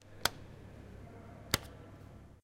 ligth switch
Sound of switching on and switching off the lights of vending machine Tanger building place.
Ambience sound and click from switch is perceived.
campus-upf, cending-machine, ligths, switch-off, switch-on, UPF-CS12